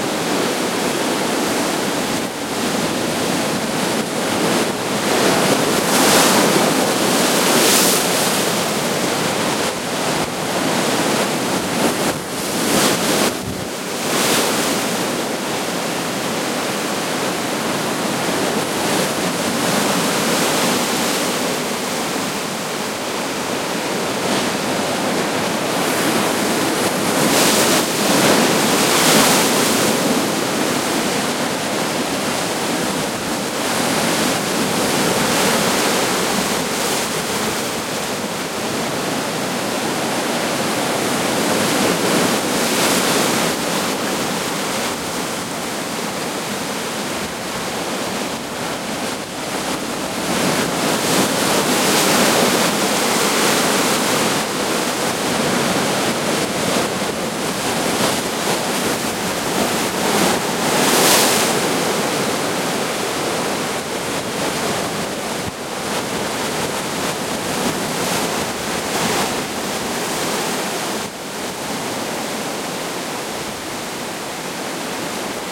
BEACH BIG WAVES STORM
beach, storm, waves, sea